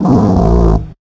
ae micCableBass
Created this sound by rubbing the microphone cord.